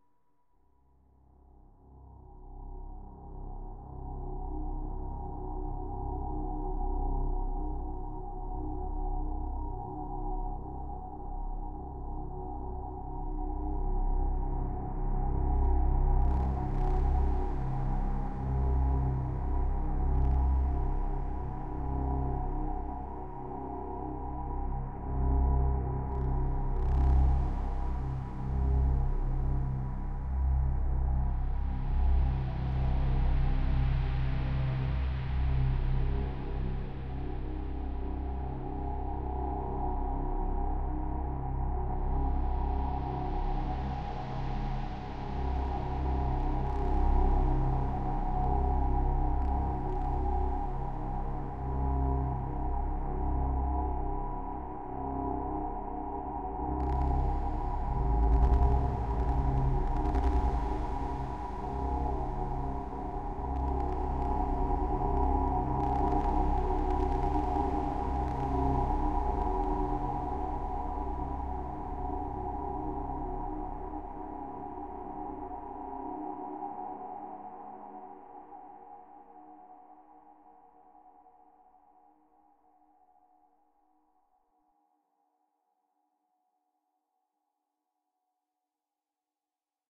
archi soundscape doom2
Created using lots of reverb (Arts Acoustic Reverb) and heavy distortion (various DaevlMakr plugins). The source signal is a synth pad of some type.